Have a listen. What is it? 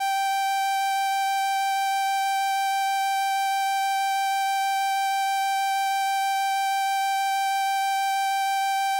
Transistor Organ Violin - G5
Sample of an old combo organ set to its "Violin" setting.
Recorded with a DI-Box and a RME Babyface using Cubase.
Have fun!
raw, combo-organ, vintage, electronic-organ, transistor-organ, vibrato